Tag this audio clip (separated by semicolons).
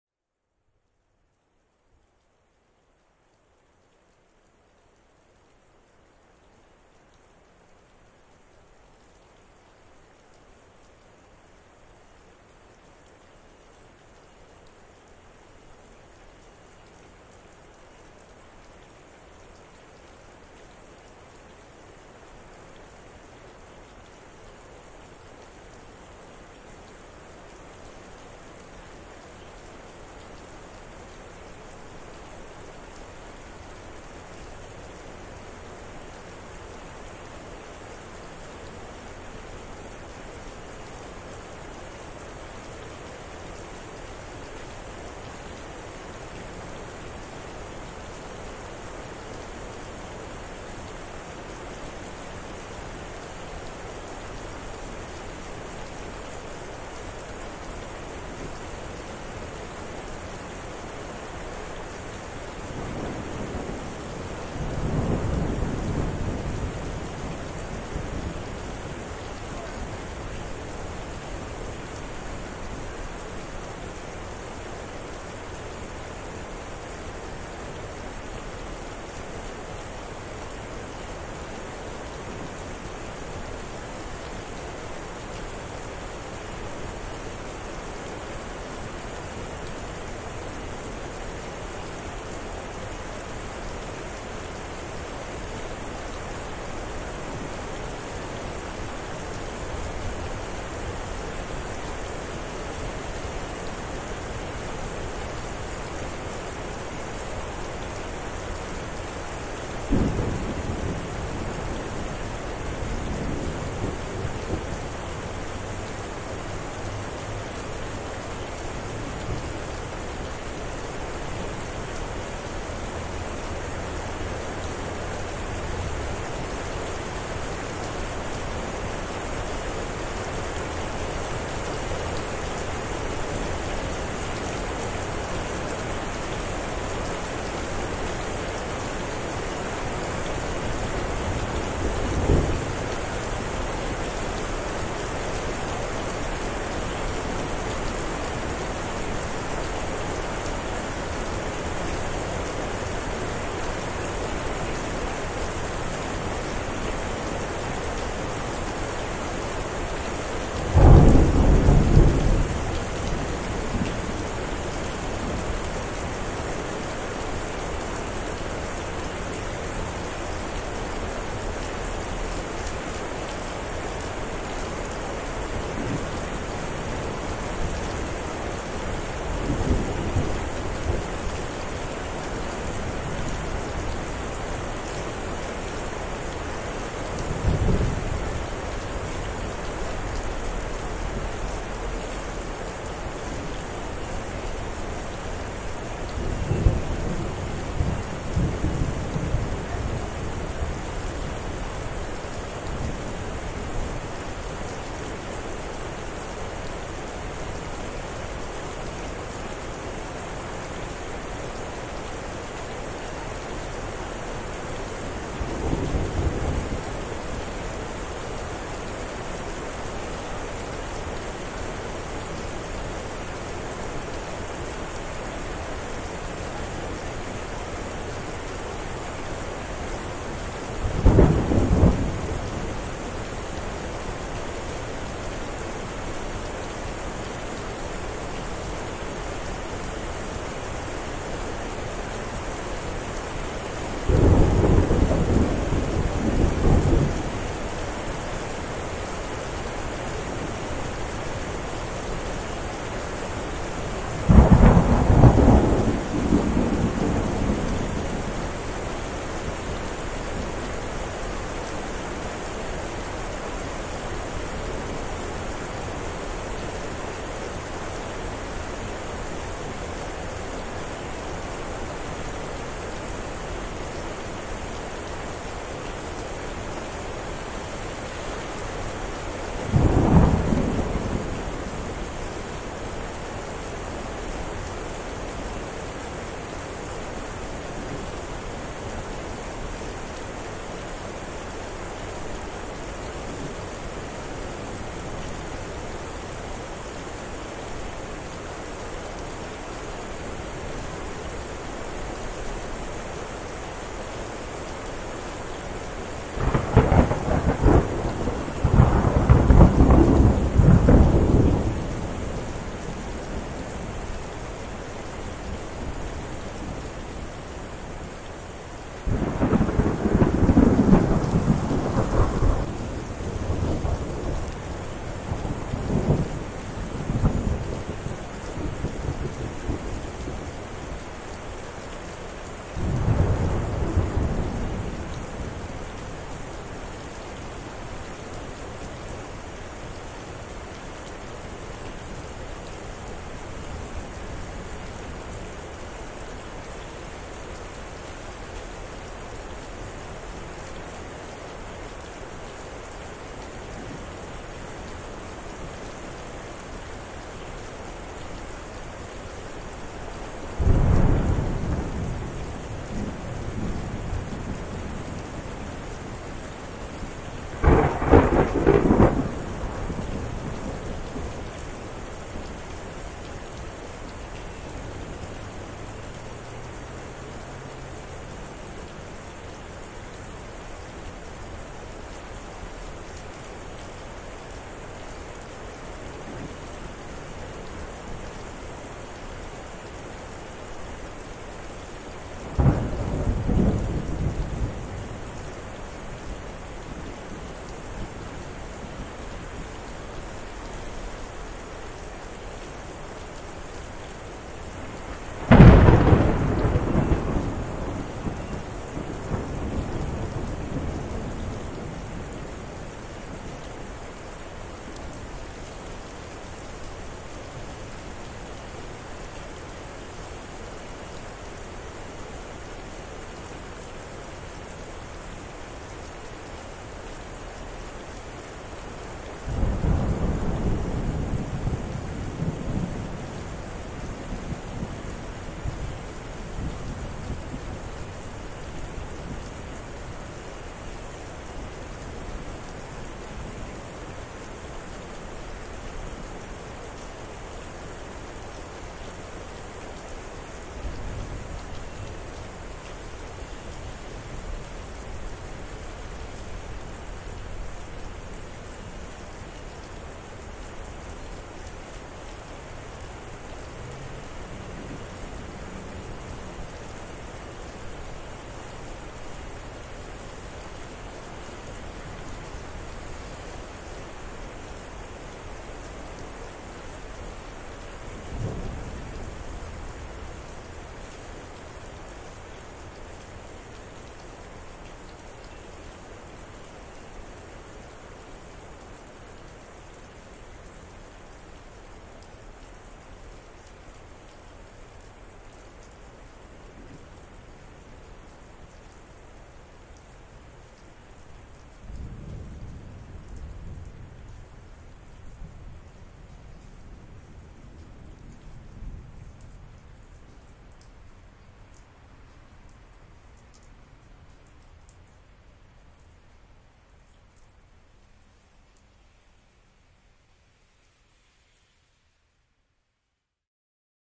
field-recording; thunder; water; rain; shower; lightning; thunder-storm; raining; weather; storm; nature; thunderstorm; ambient; rolling-thunder